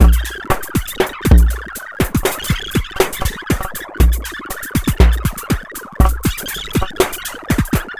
Aerobic Loop -39
A four bar four on the floor electronic drumloop at 120 BPM created with the Aerobic ensemble within Reaktor 5 from Native Instruments. Very weird electro loop. Normalised and mastered using several plugins within Cubase SX.